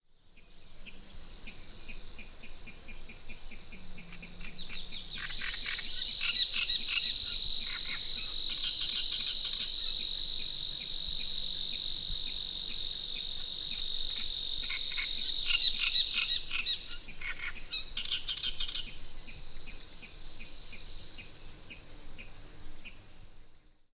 Evening conversation between birds on the lake.